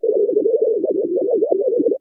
Created with an image synth program, these are modified images of brainwaves set to different pitch and tempo parameters. File name indicates brain wave type. Not for inducing synchronization techniques, just audio interpretations of the different states of consciousness.
sythesized, synth, brain, image